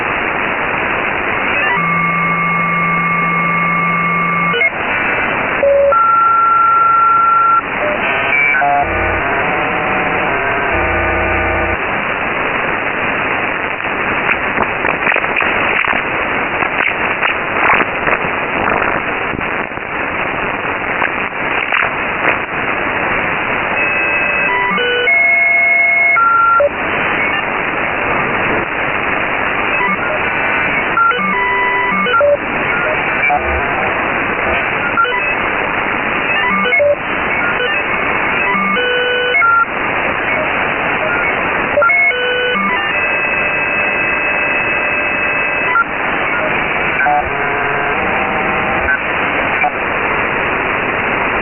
SDR Recording 002
Recorded radio scanning noise.
abstract, ambient, digital, electric, electronic, freaky, noise, radio, scane, sci-fi, space